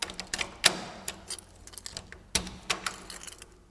Clattering Keys 04 processed 02
clattering, metal, motion, rattle, rattling, shake, shaked, shaking